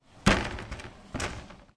Sound of a closing door impact in library.
Recorded at the comunication campus of the UPF, Barcelona, Spain; in library's first floor, door with acces to 'factoria' service.